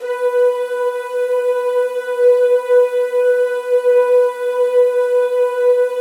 10-flutepad TMc
chorused stereo flute pad multisample in 4ths, aimee on flute, josh recording, tom looping / editing / mushing up with softsynth
b3, flute, pad, stereo, swirly